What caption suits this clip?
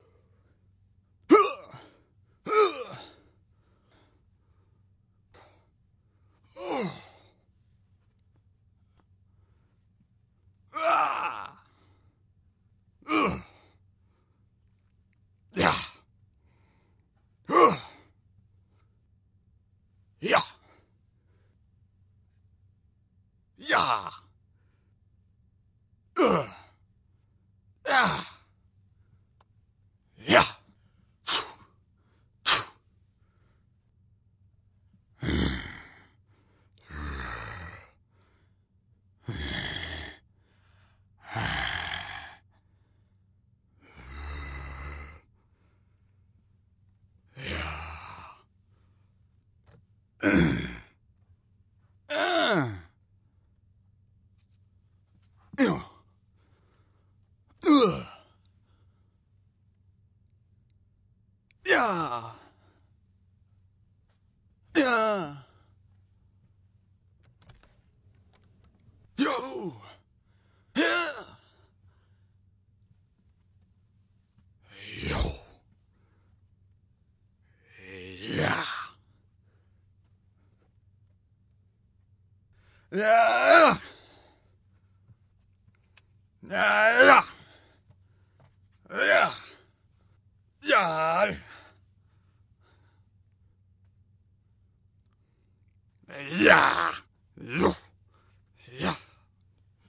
A bunch of vocal fight type sounds. I needed a bunch for a project and couldn't find any like this, there are a lot here so hopefully you'll find what you need. They have no effects on them, though there is a little clipping. File 2/2